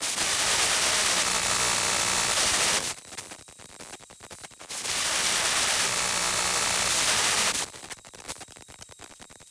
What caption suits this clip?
Ambience Spooky Electric Loop 02
An electric ambience sound to be used in sci-fi games, or similar futuristic sounding games. Useful for establishing a mystical spooky background atmosphere for building up suspense while the main character is exploring dangerous territory.
loop, gaming, sfx, games, futuristic, electronic, indiedev, soundscape, game, video-game, electric, ambient, gamedeveloping, atmosphere, gamedev, cinematic, ambience, horror, videogames, sci-fi, drone, spooky, indiegamedev, dark